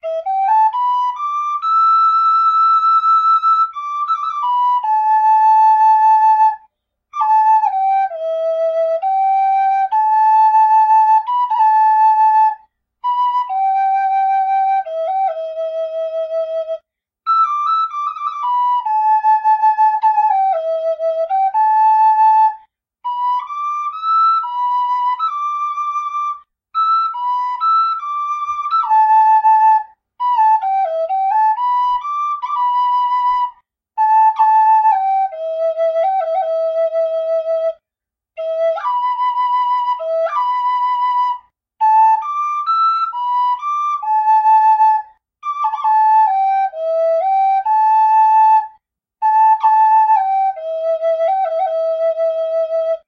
Native American flute de-noised and de-breathed
De-noised and de-breathed from SpawnofSirius. Original:
music, flute, e-minor, native-american